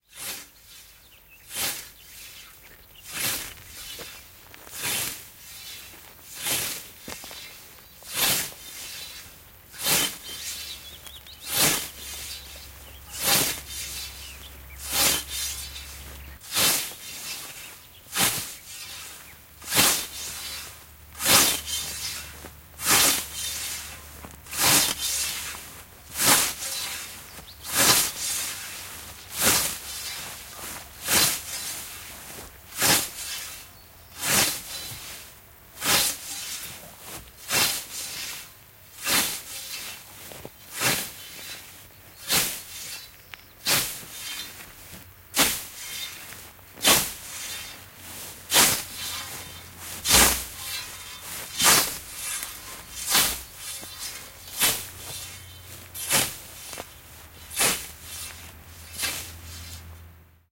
Viikate, niitto / Scythe, a man reaping grass
Viikatteella niitetään heinää. Taustalla pikkulintuja.
Paikka/Place: Suomi / Finland / Lohja, Koisjärvi
Aika/Date: 12.07.1988
Yleisradio, Maanviljely, Yle, Agriculture, Tehosteet, Field-Recording, Sadonkorjuu, Harvest, Finnish-Broadcasting-Company, Maatalous, Finland, Soundfx, Suomi